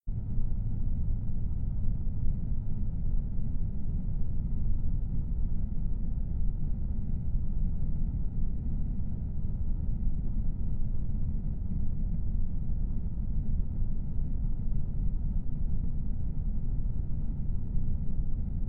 For my university final project (for it, not consisting of). I created it by changing the pitch of a recording of a loud exterior air conditioning vent.